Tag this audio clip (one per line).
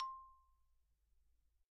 kelon
samples
xylophone
mallets